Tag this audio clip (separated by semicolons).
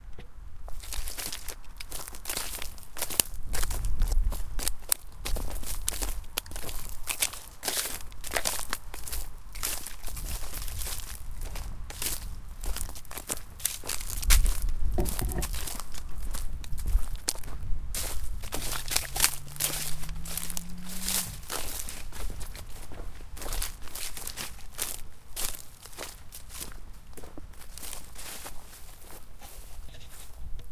footsteps; walking; field-recording